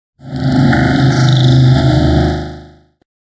Weird metallic sound from a haunted room.
haunted, scary, ghost, spooky, eerie, horror, creepy